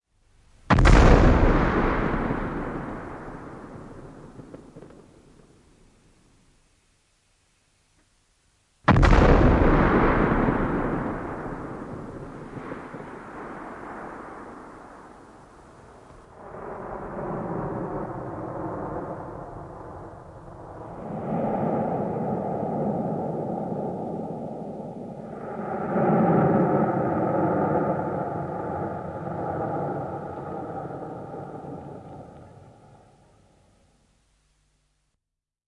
Tykki, laukaus ja kaiku / Big gun, cannon, 155 mm, shot, gunshot 2 x, a long, hefty echo
Kenttätykki, KT 155 mm. Lähtölaukaus 2 x, pitkä, muhkea kaiku.
Paikka/Place: Suomi / Finland / Rovajärvi
Aika/Date: 1957
Finland, Ase, Tykinlaukaus, Field-recording, Suomi, Cannon, Yle, Soundfx, Echo, Kaiku, Gun, Finnish-Broadcasting-Company, Tehosteet, Laukaus, Shot, Artillery